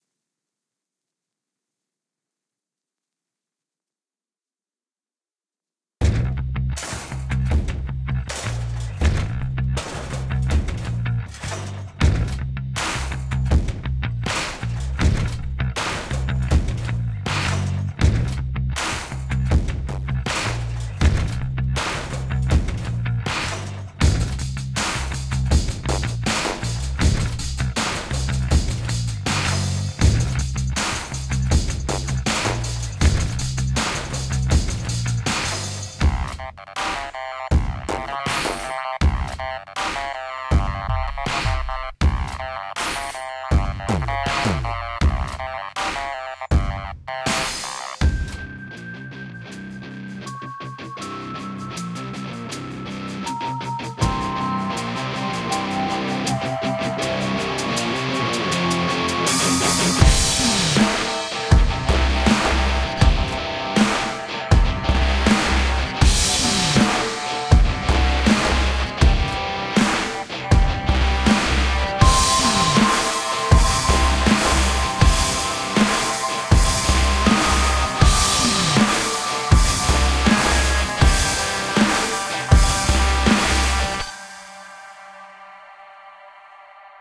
cyberpunk dump
Heavy percussive music part of a series of concept track series called "bad sector"
bass, cyberpunk, eguitar, electronic, futuristic, game, garbage, heavy, lofi, music, percussion, rhythm, sci-fi, synth